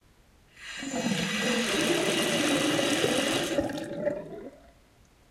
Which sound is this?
Mono recording of water falling from an opened tap into the sink. See the others in the sample pack for pitch-processed.
pitched, sink, slow, strange, water